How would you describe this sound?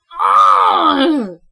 angry UUUGH!
I recorded my voice while playing freelance horror games; SCP-087-B and Slender Sanatorium. this was so I could get genuine reactions to use as stock voice clips for future use. some pretty interesting stuff came out.
frustration...